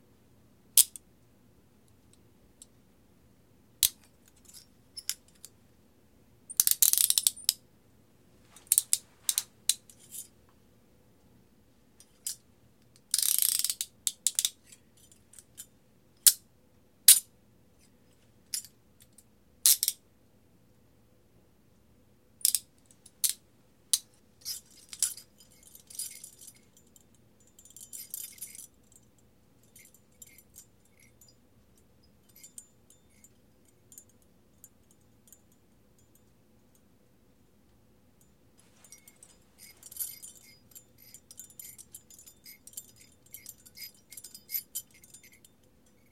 This is a collection of various handcuff sounds. It includes clicking, closing, and general rustling.
Recorded using a Tascam DR-60D and Neewer Shotgun Mic.